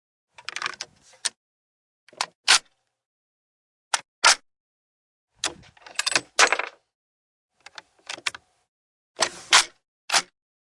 weapon handling mechanical noises
A few mechanical sounds of a weapon being loaded and unloaded
handling, weapon, bullet, mechanical